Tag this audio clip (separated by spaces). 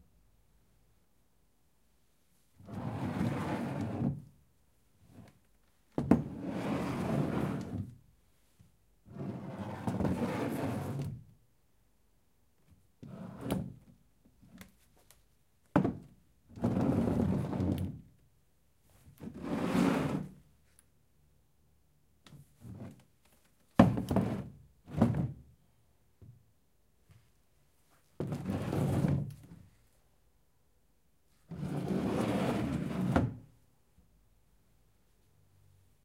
chair metal steel wood